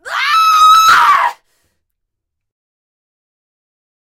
Panicked woman scream
Recordists Peter Brucker / recorded 11/10/2018 / ribbon microphone / performer J Beltz